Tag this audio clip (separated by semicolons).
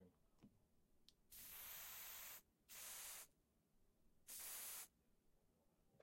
arisole; can; hairspray; spray